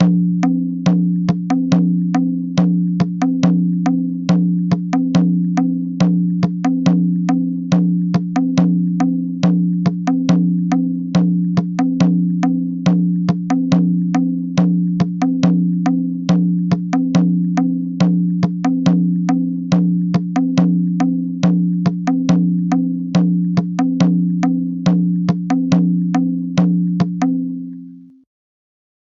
maadal-general-00
Example of a rhythm using maadal instrument.
Adjust your tempo.
drum, maadal